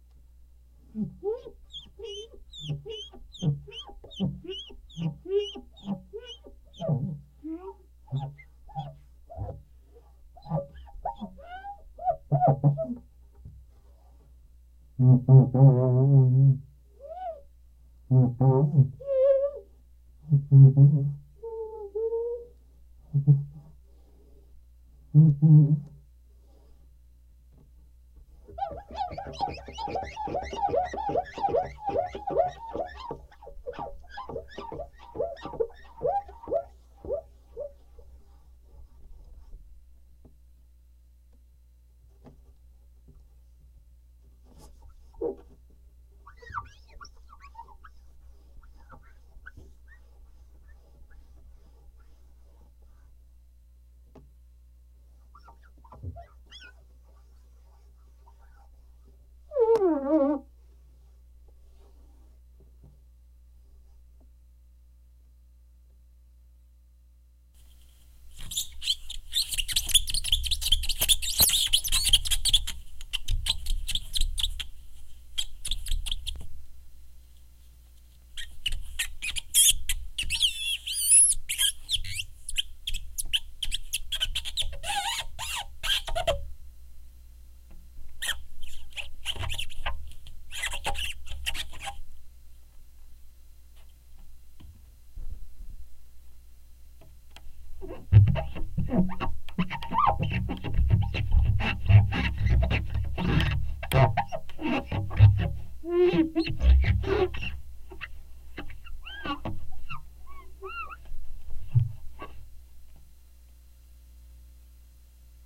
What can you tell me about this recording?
window cleaning
The squeaky sound while cleaning glass. This file is first with a soft cloth, then very squeaky with some foam cloth, then last very deep with a different type of soft cloth. Recorded with a Cold Gold contact mic into a Zoom H4.
mirror, wipe, request, contact, squeak, life, foley, glass, cleaning